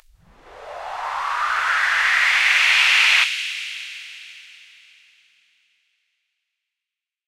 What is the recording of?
white noise-fx
fx, sounddesign, white-noise